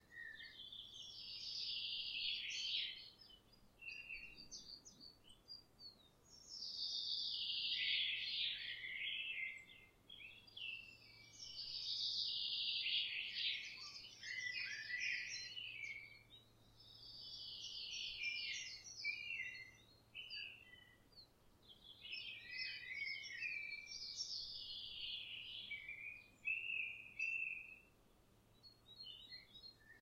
little birds singing